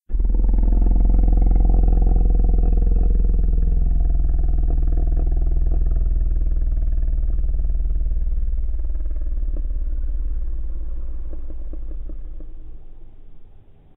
Monster Long Rumble 2
A long monster rumble.
monster, long, fantasy, creature, rumble